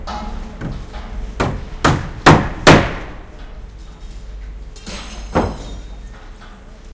Sounds of someone on a construction site using a hammer. Unprocessed field recording.
building, construction, environmental-sounds-research, field-recording, hammer